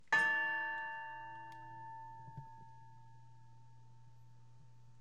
CR BedpostRing1
An old bedpost struck with a small pipe - long ring
bedpost, clang, long, metallic, ring